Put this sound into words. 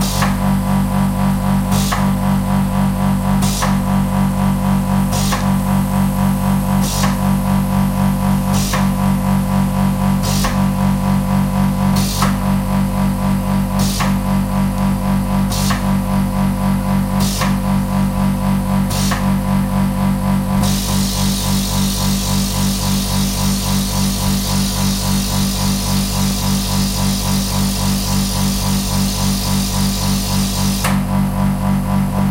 Sound of a digipress machine, which is used to make a Lymphatic drainage massage. It looks like pants which are inflating and deflating. Recorded with Zoom H1 at one massage center in Banska Bystrica (Slovakia) when my girlfriend was having the Lymphatic drainage massage.
50-hz, body, drainage, human, massage